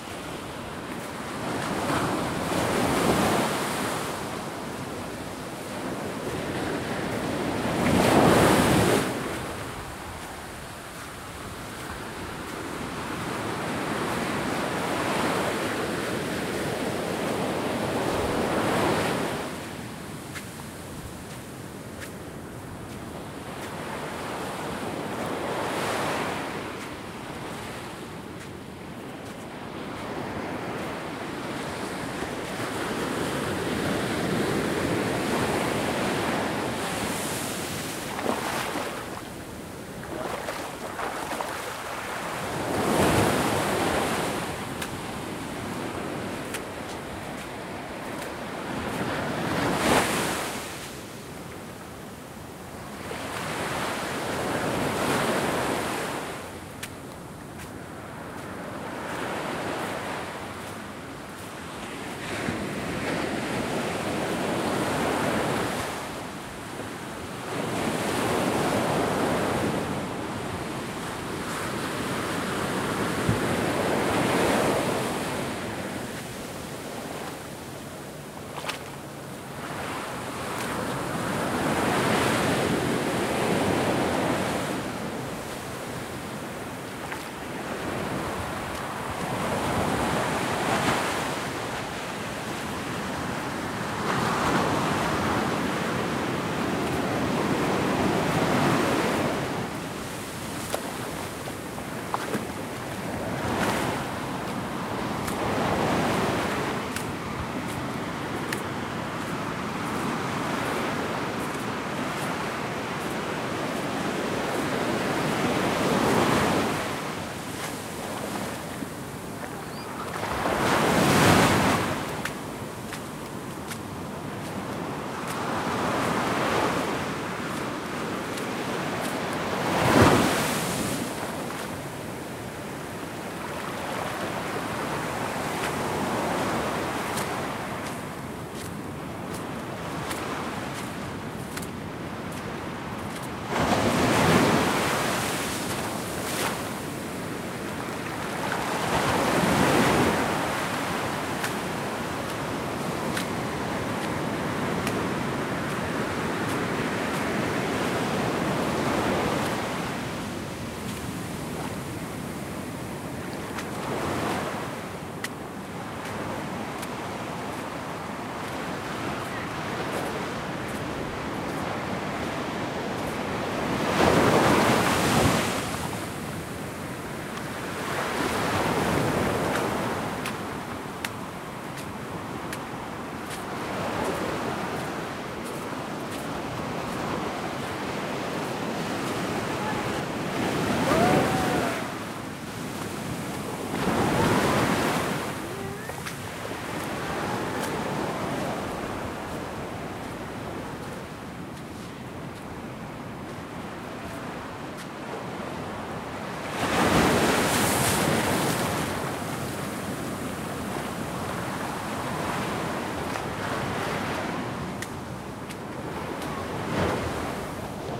walkdeepsand wavesandwater
walking at a medium speeding in an ocean side park
beach, feet, footsteps, ocean, steps, walking, water